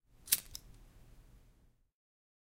DSP Foley LighterFlick
Lighter-Flick, Foley-Sounds, Zoom-H4